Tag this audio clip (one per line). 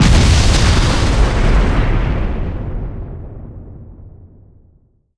shockwave
far
impact
explosion
heavy